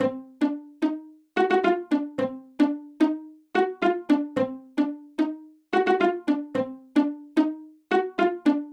destiny pizzis 100bpm
Pizzicato string loop; for the destiny pack. MISLABELLED: actually 110bpm. Created in Reason.
strings, pizzi, loop, pizzicato, pluck